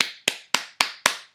Alexia navarro01
Description de base: Applauses on a sofa recorded in my lounge.
Typologie (P. Schaeffer): X'' itération complexe
Morphologie:
- masse: son seul complexe
- timbre harmonique: sec
- dynamique: frappe violente mais vélocité constante
- profil de masse: peu de basse
alexia, applauses, lyon3